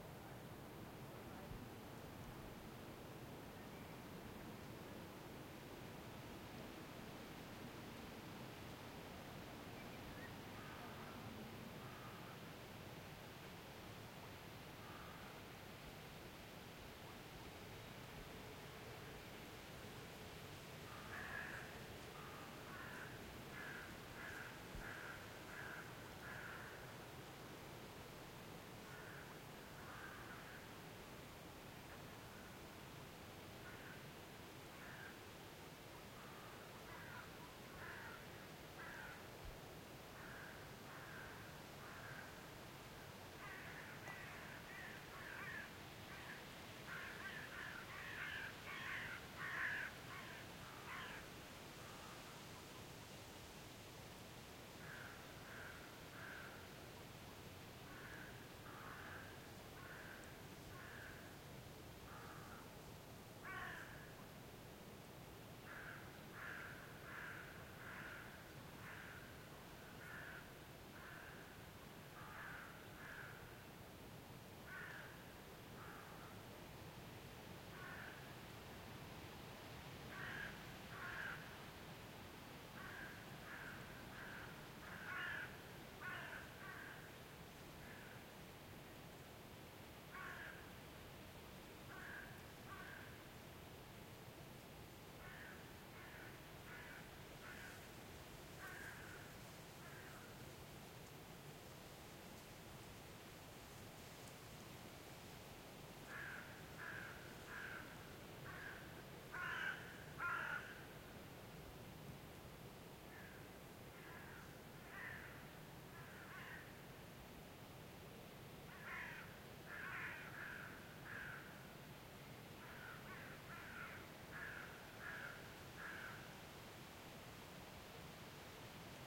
Lagoon evening, wind in trees, crows
Lagoon ambience in summer, evening. Wind in trees and crows.
Stereo, MS.
Recorded with Sound devices 552, Sennheiser MKH418.
ambience, birds, crows, evening, field-recording, lagoon, lake, lithuania, nature, seaside, summer, wind